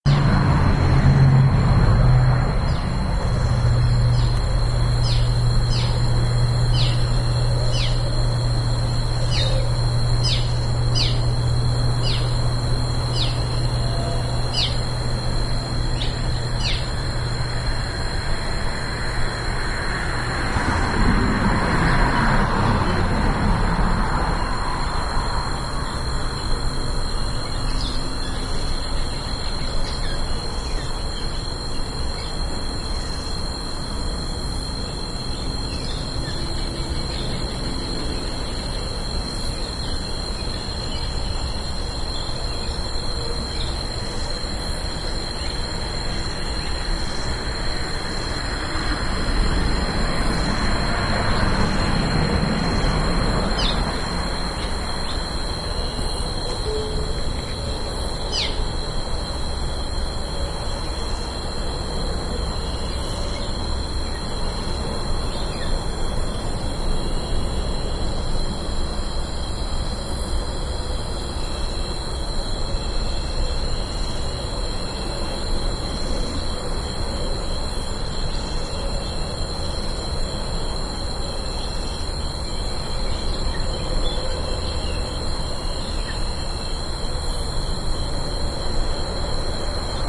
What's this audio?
binaural short
field-recording, cars, engine, nture, roads, crickets, brush, bridge, at822, austin, motor, bugs